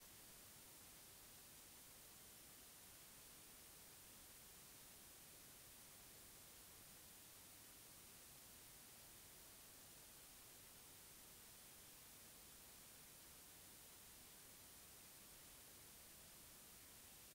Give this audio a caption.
marantz.flash.recorder.noise
This is white noise generated from a marantz PMD-670 solid state recorder. I've found this file very useful for removing noise from sounds recorded with the 670, using this file as the global noiseprint/footprint preset for broadband noise reduction software such as BIAS soundsoap and Waves X-Noise. Lifesaving stuff!
broadband, noiseprint, reduction, white